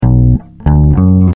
Bass line melody of 3 notes created in FL Studio with the soundfont player plugin.